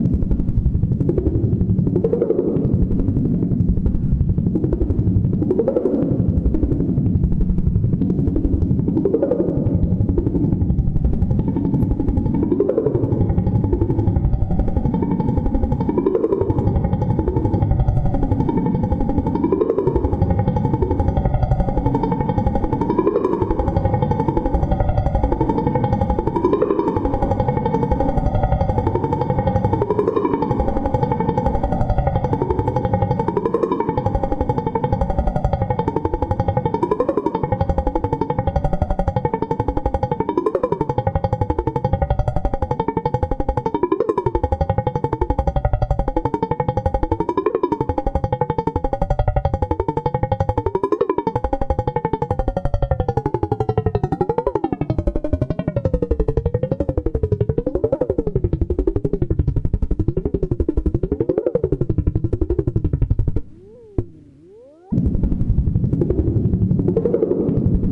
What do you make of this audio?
chopper threw a wall of glass
Helicoptery sound as if heard through a wall of crystal or glass. Another ring modulated sound but through a low pass filter being modulated by an square wave LFO.
It's harder to describe these things than to make the sounds.